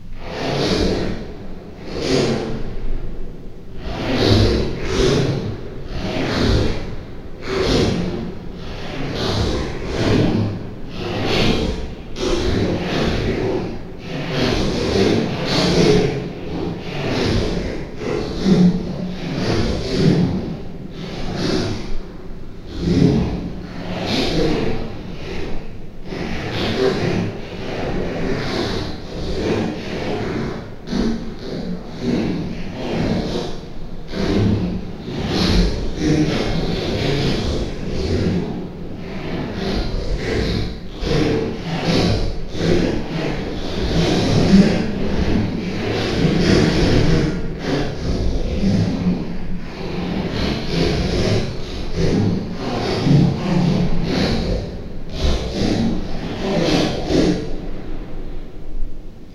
This is me pulling the zipper on one of my leather jackets up and down. It was recorded on my Logitech USB mic and run through Audacity, lowered pretty much as far as it would go, given a medium-set dose of Gverb effect, a pass with the hard limiter, and a bit of bass boost.